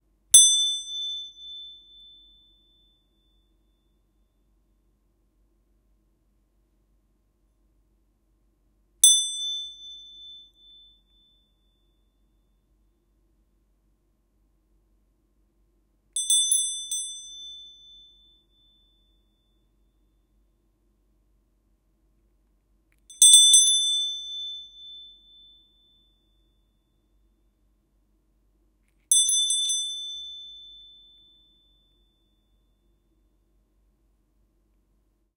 A beats of small bronze bell. The bell painted with oil paint.
See also in the package
Recorded: 03-02-2013.
Recorder: Tascam DR-40
bell, bonze-bell, chime, clang, ding, metallic, ring, ringing, small-bell, ting